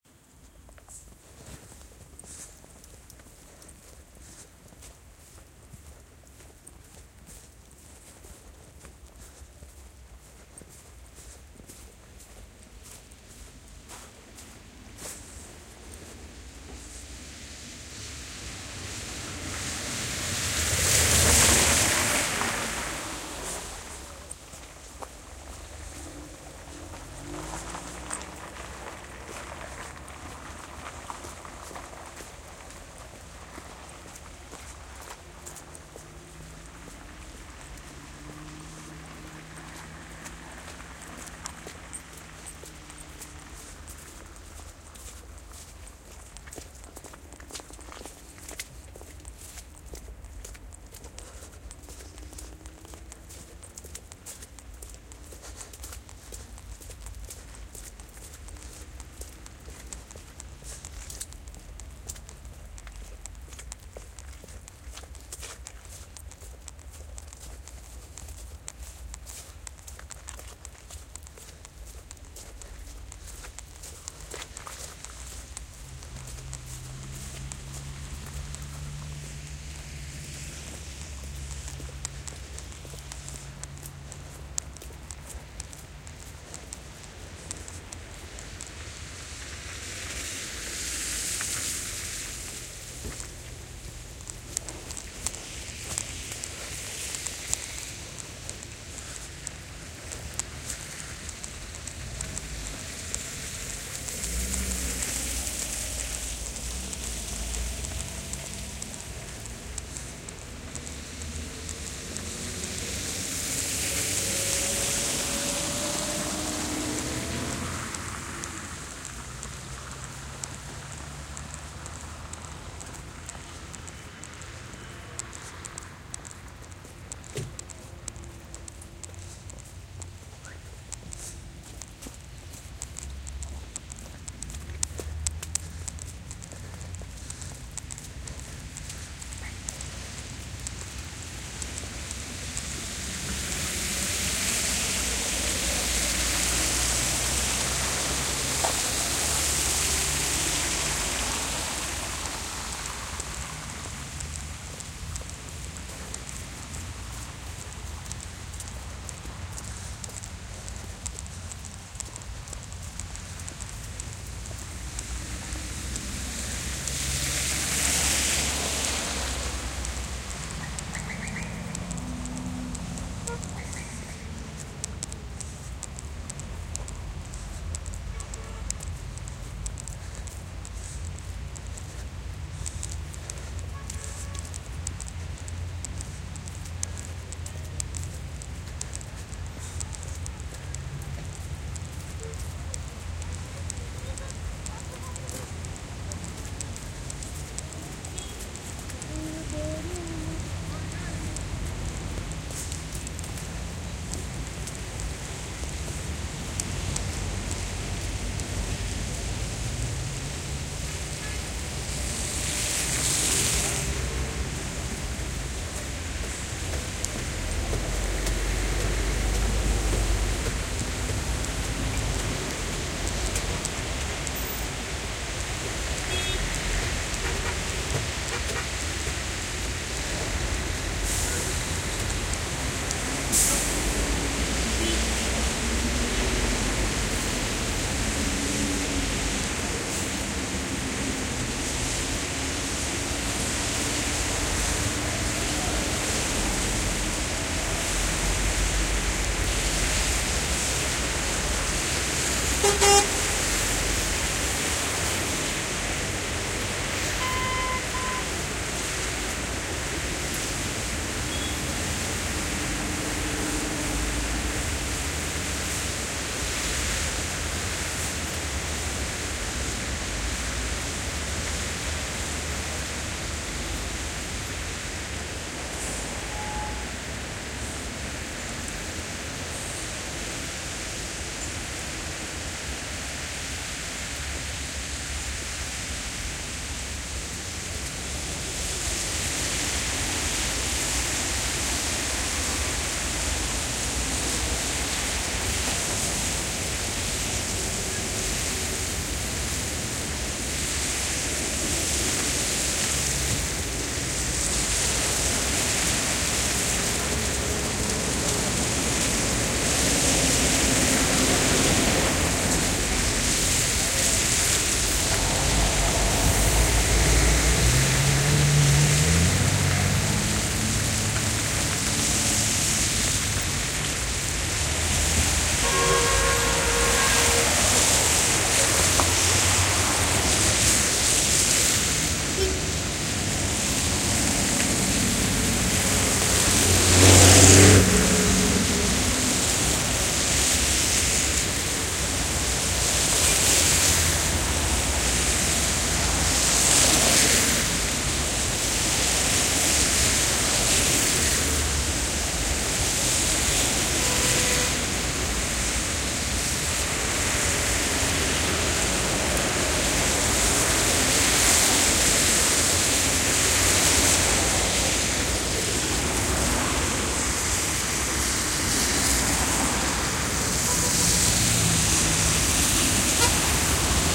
Artesh Highway 2017-02-13
On a cold and snowy morning, I walked from a back alley to the side of the highway and went on an overpass.
You can hear my footsteps on snow, my chattering teeth, cars passing by, people talking, and a busy highway.
Recording date and time: 2017-02-13 08:07 IRST
Recording Device: Stereo microphones on Blackberry DTEK-50 Smartphone
Subway, Iran, Tehran, City, Stereo, Ride, station, Metro, Binaural, Trains, Urban, Field-recording, Transport